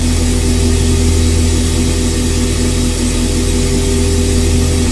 Created using spectral freezing max patch. Some may have pops and clicks or audible looping but shouldn't be hard to fix.
Background, Everlasting, Freeze, Still